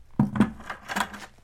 plastic bucket down on dirt grit ground floor
grit, bucket, plastic, floor, ground, down, dirt